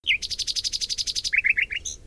Bird Cherp 43
Bird, Field-Recording, Foley